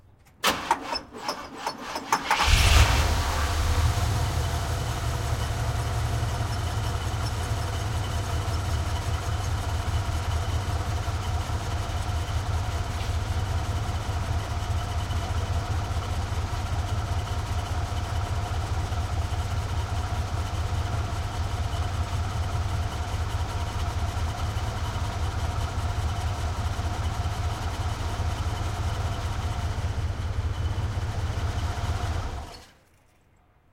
Vintage Cadillac Turn On Off Engine Split to mono and mix to taste
cadillac, car, engine, vintage